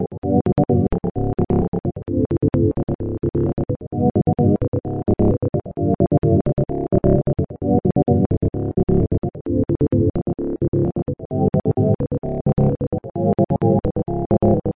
love gate 4 sound i made using fl studio